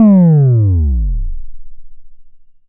From the Drum 1 Channel of the Vermona DRM 1 Analog Drum Synthesizer